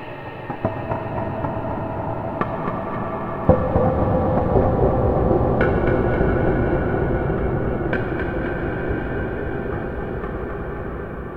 Horror CookieMonster count4
A whole ton of reverb and delay on some found-sound recordings.